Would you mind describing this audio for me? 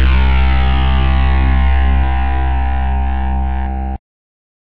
Sample05 (Acid303 B1-3-5-6)
A acid one-shot sound sample created by remixing the sounds of